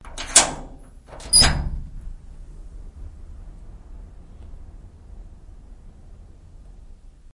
Iron door2 O
opening, iron, door, closing, open, close